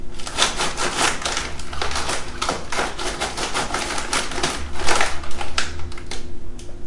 Opening popcorn bag
popcorn, ripping